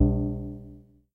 Nord Drum TOM 3
Nord Drum mono 16 bits TOM_3